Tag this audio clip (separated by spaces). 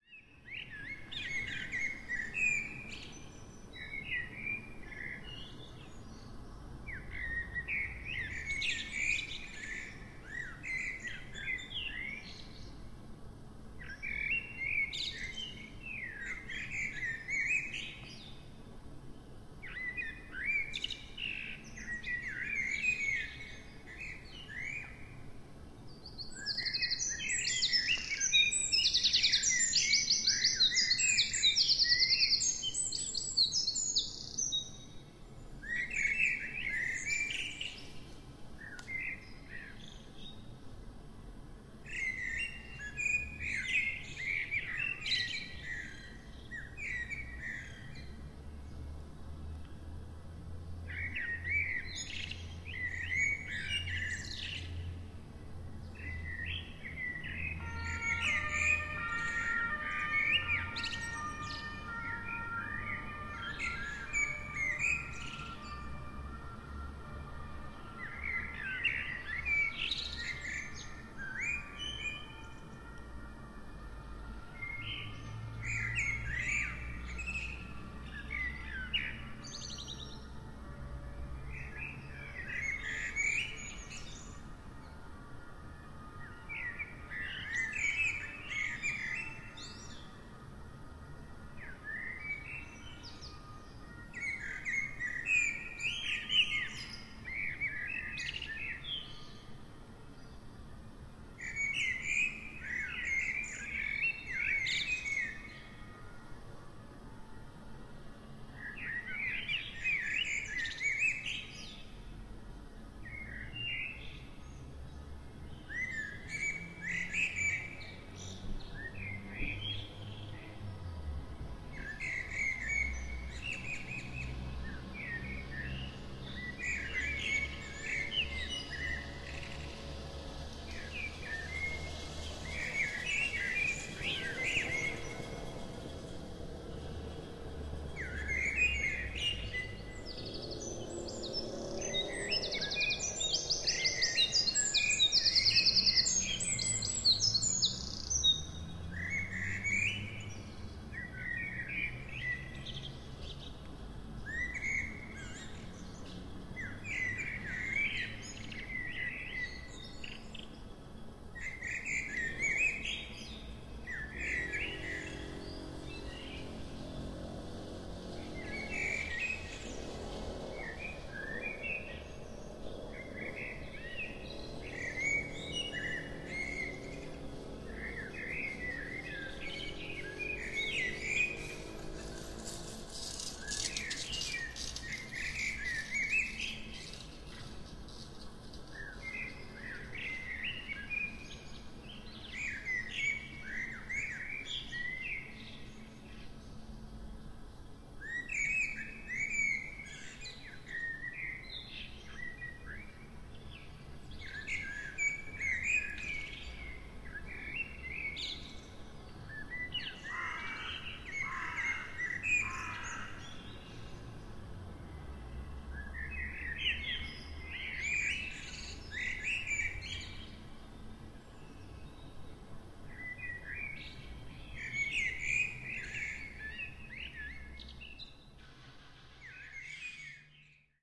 recording birds field